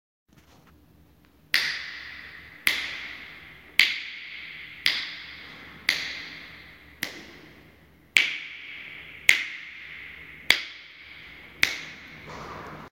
snapping in stairway
Fingersnapping in a stairway with a lot of echo